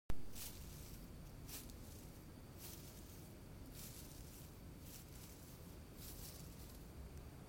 pasto yard arbusto